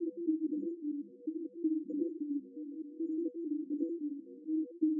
Sequences loops and melodic elements made with image synth.
sequence, loop, sound, space